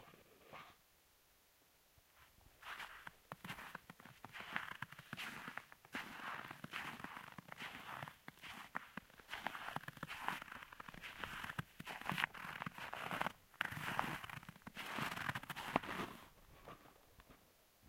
Crispy snow footsteps-01
Steps on crisp snow on a quiet night outside the city. Stereo.
crisp, crunch, foot, footstep, footsteps, quiet, slow, snow, sound-design, step, steps, walk, walking